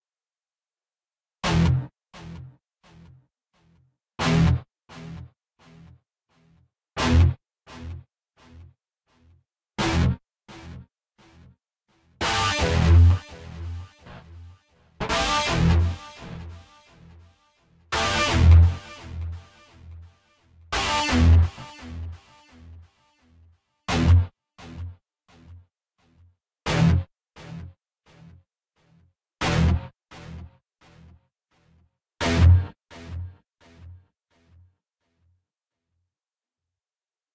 A very heavy guitar sound produced by playing a Fender American made Stratocaster through a DigiTech GSP2101 guitar processor. Cool sound, but HEAVY!